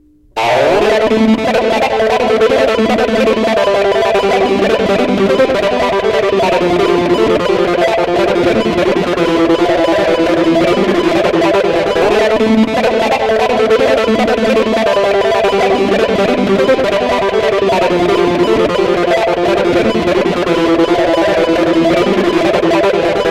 Bass solo extracted from a multitrack recording of one of my songs. Peavey Dynabass through Zoom bass effects direct to disk with Voyetra software.